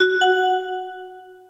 You can use it as a 'ting' for your radiostation etc.
You do not need to display my name in your published works.